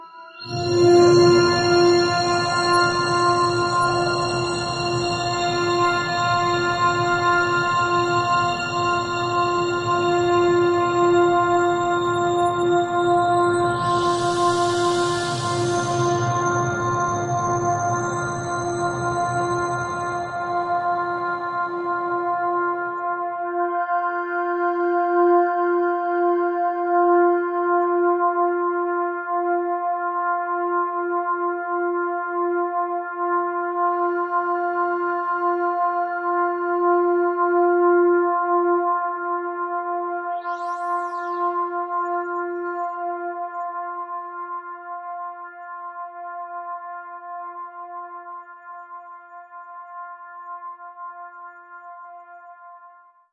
LAYERS 007 - Overtone Forest - F5
LAYERS 007 - Overtone Forest is an extensive multisample package containing 97 samples covering C0 till C8. The key name is included in the sample name. The sound of Overtone Forest is already in the name: an ambient drone pad with some interesting overtones and harmonies that can be played as a PAD sound in your favourite sampler. It was created using NI Kontakt 3 as well as some soft synths (Karma Synth, Discovey Pro, D'cota) within Cubase and a lot of convolution (Voxengo's Pristine Space is my favourite).
multisample, artificial, pad, drone, soundscape